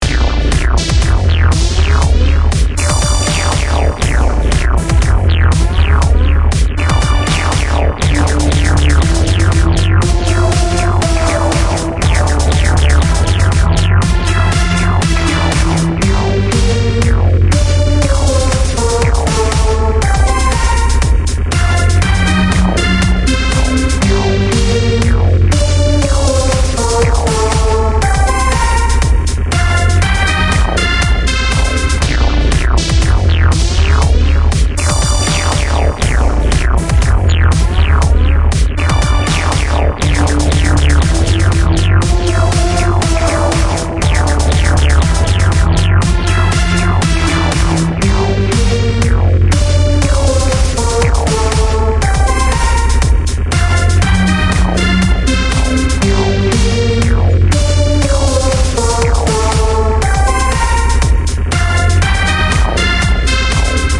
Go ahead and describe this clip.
A short clip from one of my Original Compositions.
Equipment used: Audacity, Yamaha Synthesizer, Zoom R8 Portable Studio, Hydrogen and my gronked up brain.